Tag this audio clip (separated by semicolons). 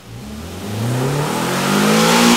benz; dynamometer; dyno; engine; mercedes; vehicle; vroom